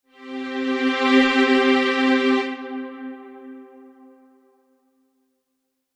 Consonance Example
A perfect-fifth consonance sound from an instrument in GarageBand.
Perfect, Happy, Stable, Strings, Consonant, Pleasant, Violin, Fifth, Euphonic, Example